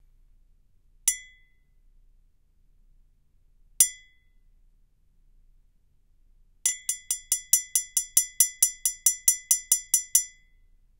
COFFEE MUG STRIKES 1
-Coffee mug strikes and clanks